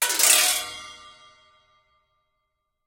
Sample of marimba resonance pipes stroked by various mallets and sticks.